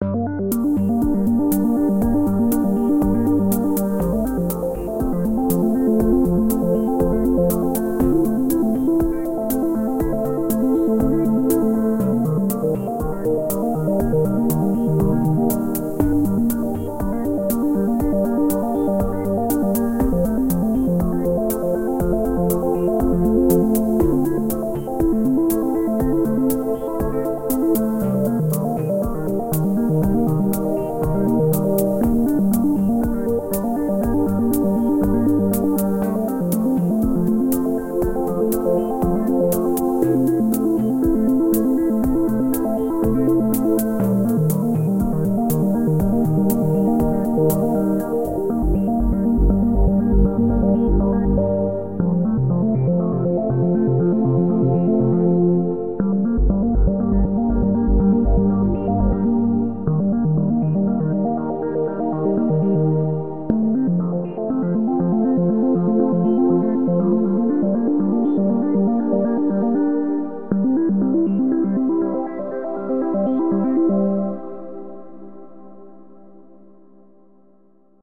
Cosmic minimal music fragment
minimal background electronic music fragment
background, cosmic, diatonic, minimal, minor-key, music